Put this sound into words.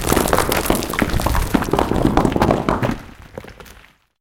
Rocks, bricks, stones falling, rolling.